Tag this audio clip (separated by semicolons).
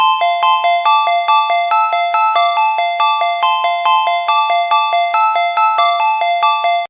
thoughtful; mills; mono; cell-phone; ring; phone; ring-tone; alert; cell; 3; ring-alert; 8va; mojomills; jordan; mojo-mills; free; tone; 10